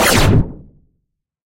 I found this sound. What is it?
Medium Blaster
Loud, Pulse, Gun, SciFi, Light, Blaster, Shoot, Gunshot, Fire, videgame, Machine, Shot, Rikochet, Bang, Pew, Rifle, Laser, Heavy